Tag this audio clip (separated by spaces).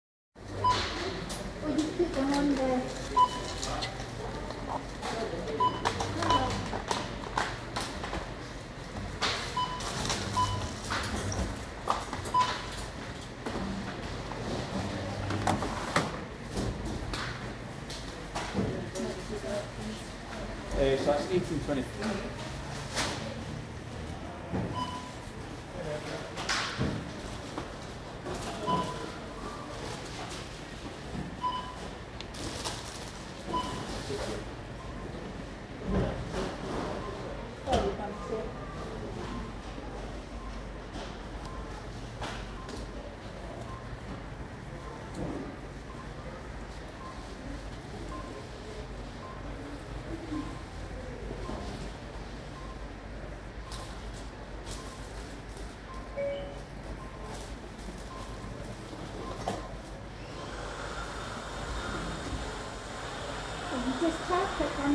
voice speech